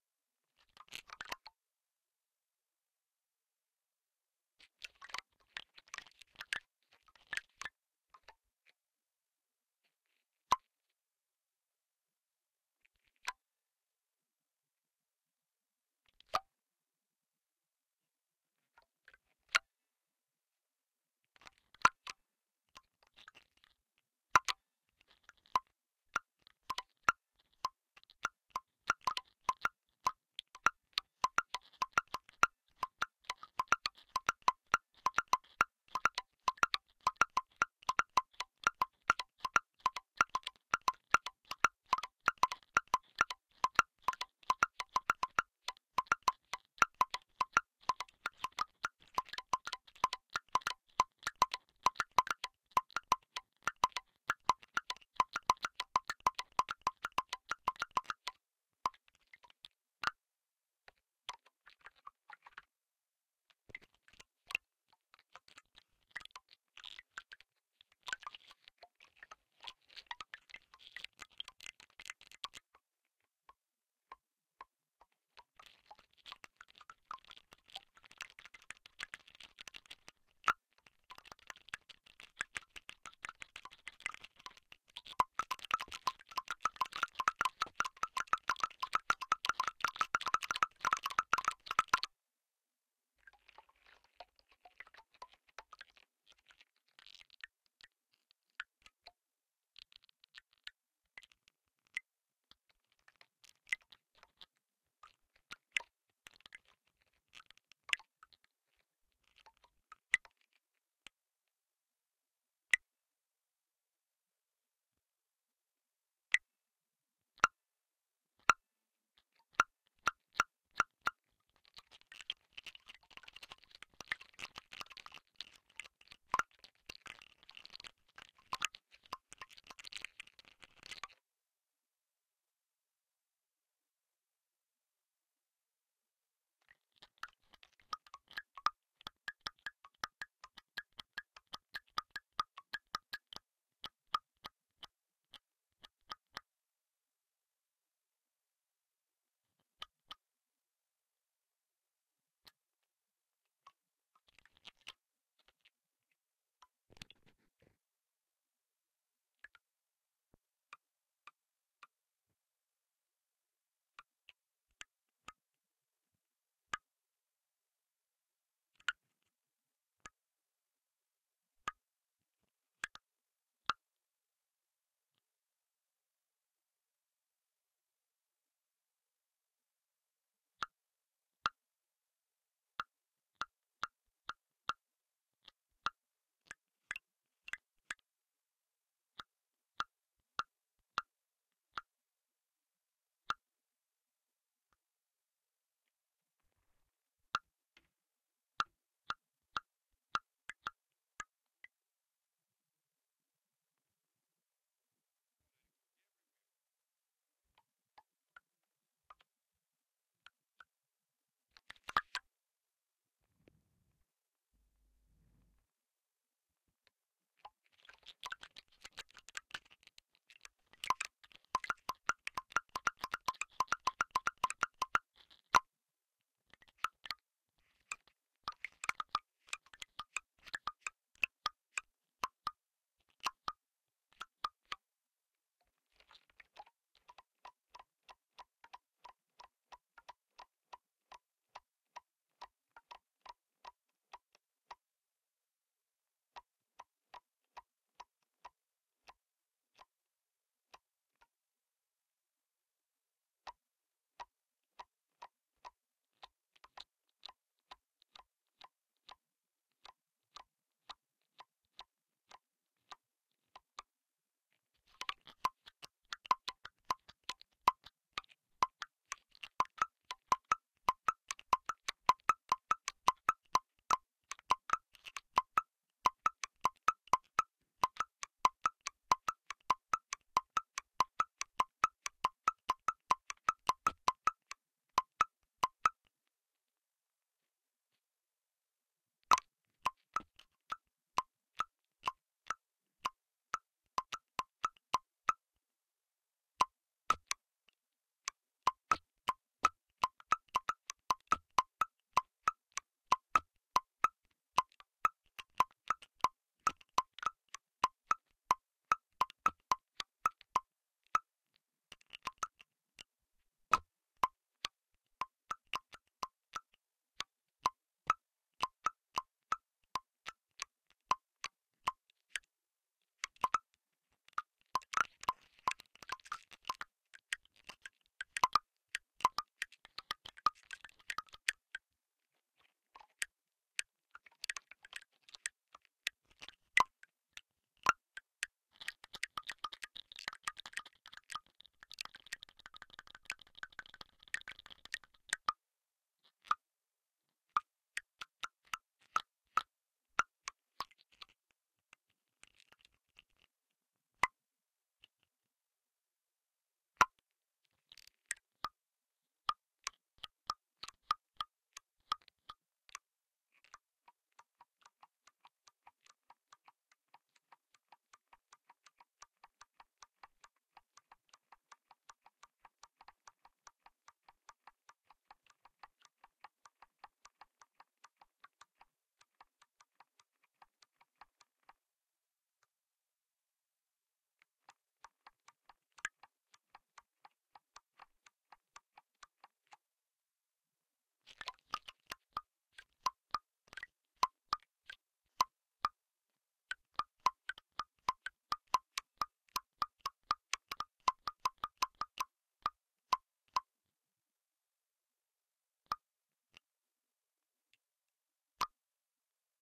Recording of a cactus being plucked and stroked by its owner. Sounds like clicks as each spine is plucked. The cactus belongs to Greg Jenkins who has been playing it for some years. It has two piezo contact mics glued to it, which were recorded to left and right channels. The file has been normalized and I edited out a few silent passages. Recorded with a Tascam US-224 into AudioMulch.
cactus, click, contact-mic, purist